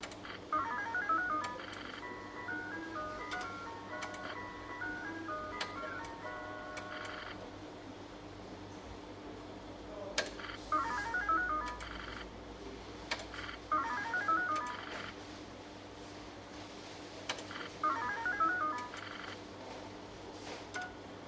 video poker 2
video poker in a bar in rome 2